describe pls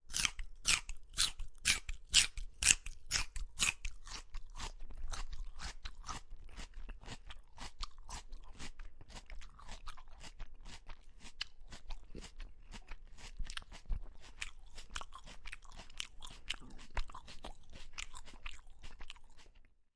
AMB celery-chewing-01
Chewing a celery stick at home during the pandemic.
chew, celery, eating, munching, bite, chewing, eat, food, foley